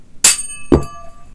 ting-clunk
Dropped and threw some 3.5" hard disk platters in various ways.
Ting and longish ring out followed by clunky impact